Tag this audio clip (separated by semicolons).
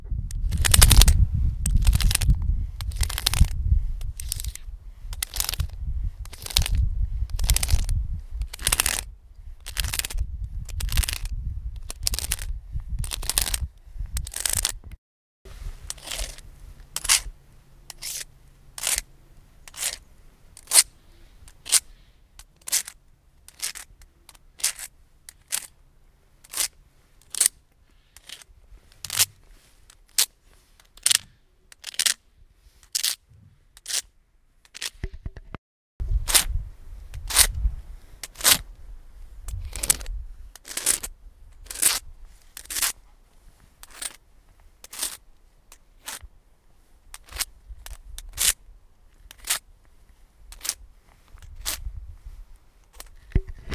Pen; Plastic; Rock; Scraping